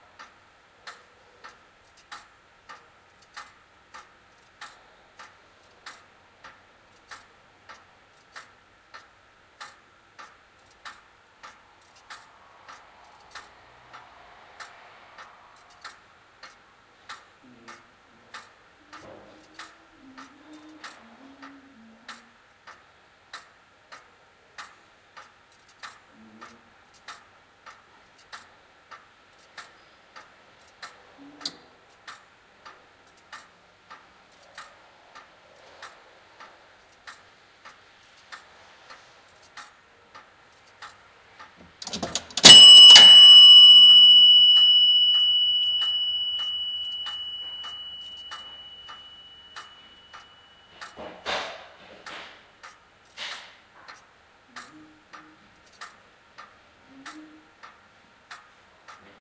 Clocking In Machine (1920)
This is the sound of a factory clocking in machine from 1920.
clock, industrial